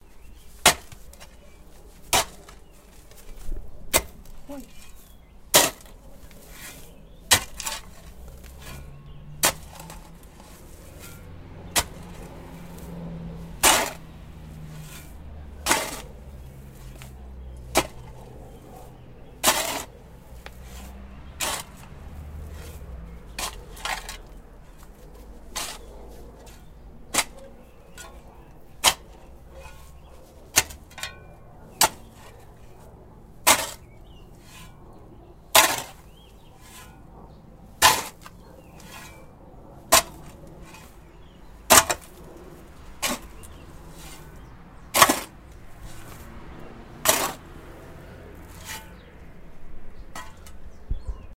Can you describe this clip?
Dig a hole with a gavel